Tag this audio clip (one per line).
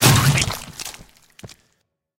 car
crash
gory
motorcycle
smash
squish
zombie